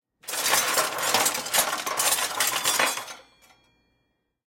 Metal Clatter 1
various metal items
metallic, metal, clatter